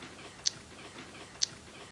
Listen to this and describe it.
Random Loop
nice loop coming out of random sounds
apple, BAckground, Beat, Bite, chew, crunch, eat, eating, experimental, food, Loop, munch